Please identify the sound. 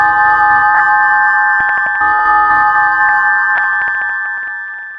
semiq fx 12
digital, sci-fi, loop, electric, abstract, soundesign, sfx, strange, sound-design, future, electronic, lo-fi